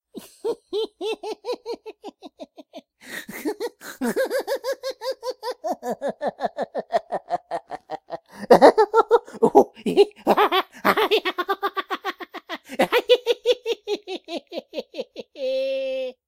Evil Laugh 7
Now for my fav. This one was tricky to get just right. But i think you'll all agree it has quite the impact. Sorry if i made you suddenly afraid of clowns.....I REGRET NOTHING!